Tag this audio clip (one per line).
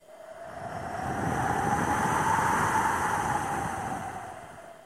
Wind
blowing
cyclone
scary
thriller